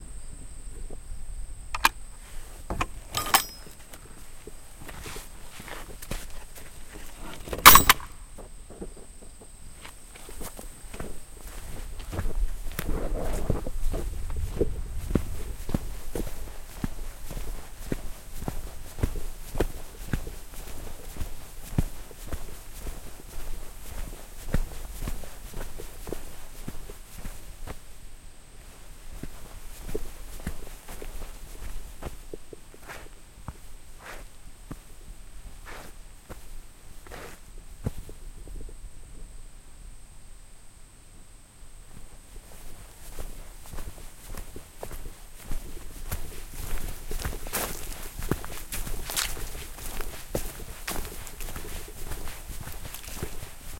Gate to outdoor horse pen opens, footsteps, gate closes. Footsteps on grass and gravel